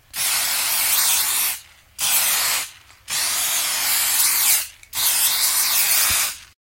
SprayCan Spray
Spraying a spray can
spray-can, spray